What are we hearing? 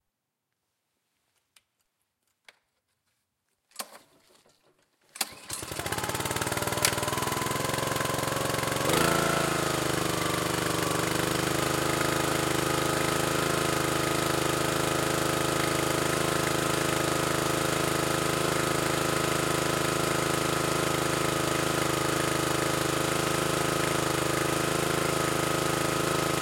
pull-start-idleup
Small honda motor is pull started, under choke, then choke is opened engine idles up